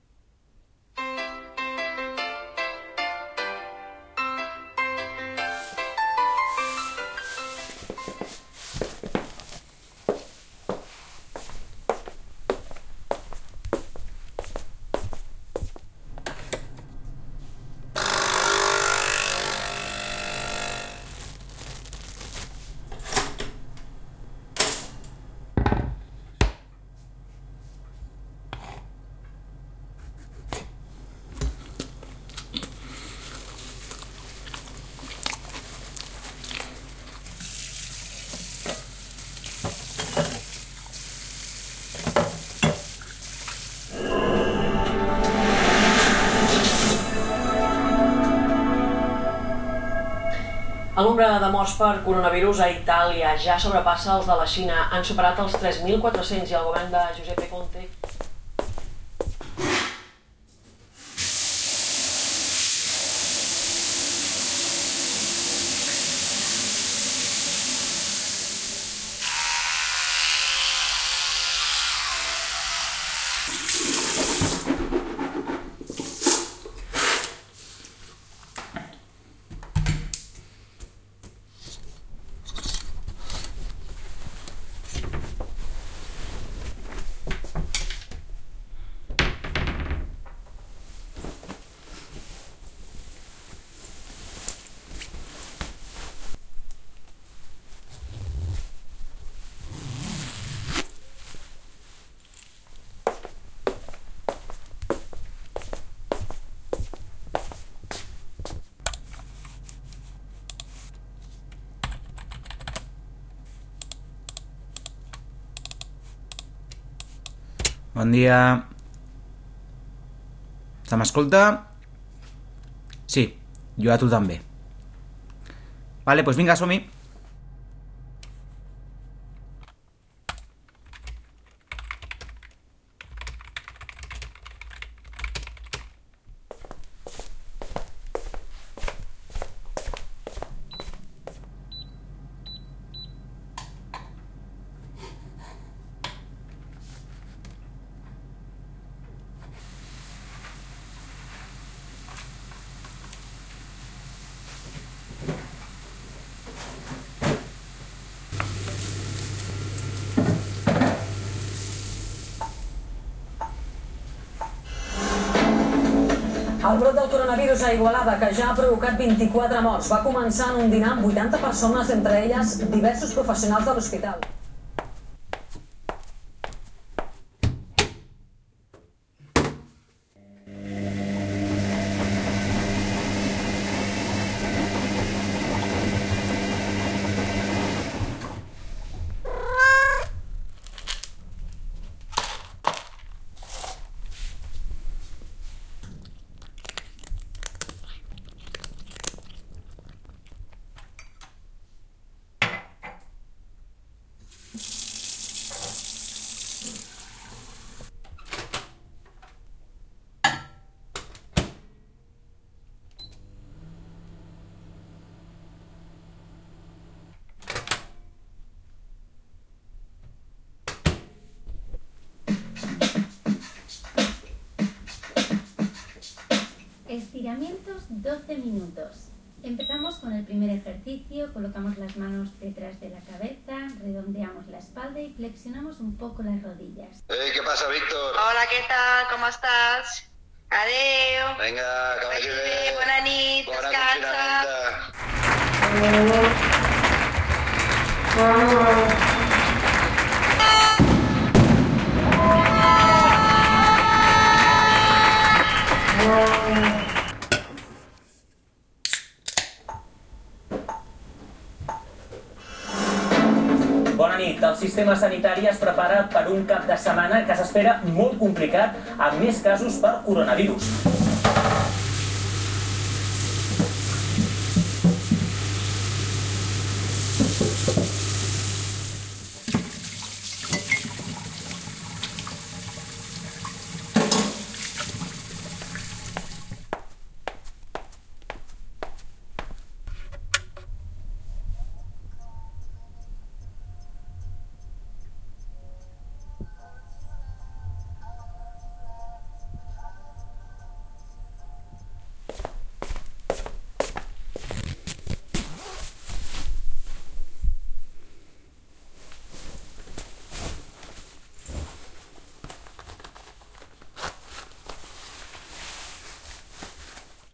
Sound creation produced confined at home by Covid-19. A soundscape narrative travelling by my day by day activities.